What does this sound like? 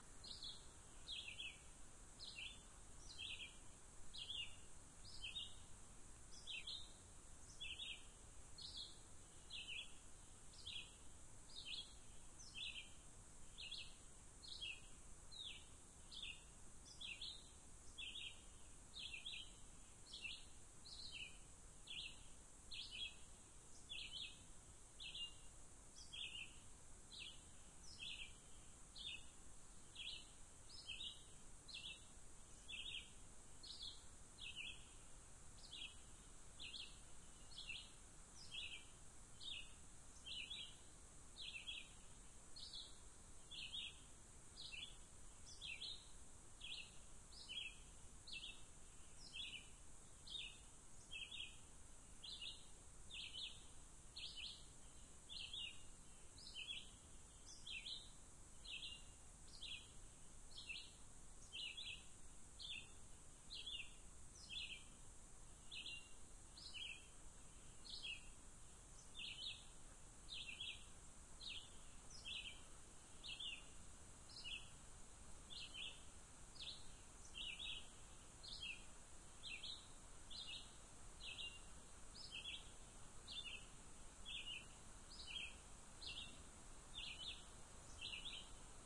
Forest, light breeze, bird song. This sample has been edited to reduce or eliminate all other sounds than what the sample name suggests.
forest, birds, field-recording